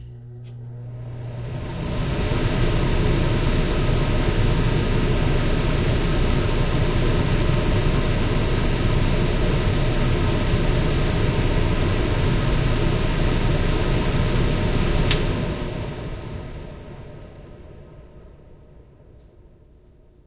My stove's fan/vent/blower. A bonus is the nice clicking sound when I turn it off.